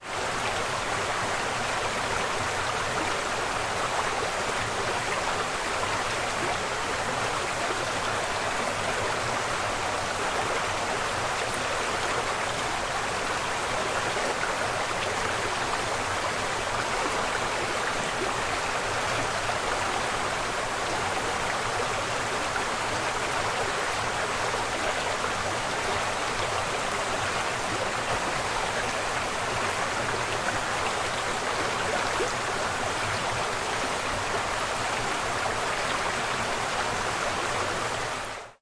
Close recording of waterfall in Gortin Glen Forest Park in winter.
Captured with Zoom H5